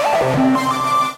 Electric Guitar 1
Emulations of electric guitar synthesized in u-he's modular synthesizer Zebra, recorded live to disk and edited in BIAS Peak.
Zebra, blues, electric, electronic, guitar, metal, psychedelic, rock, synthesizer